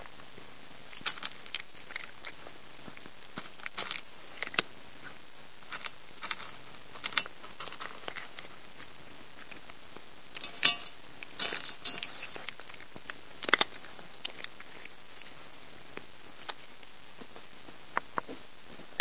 One of my cats eating biscuits out of a tin bowl.
chew, eat, cat, cat-biscuit, biscuit, crunch, chomp